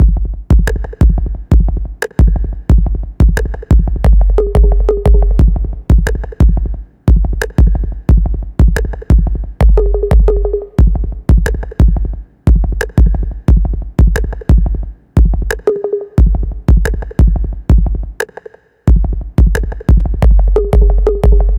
A drum loop I made for fun. It seemed pretty good as a basis for experimentation so I made the following track with it:
The delay really makes it pop, I think.